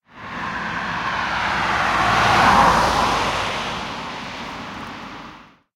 A passing car with appr. 100 km/h on a wet road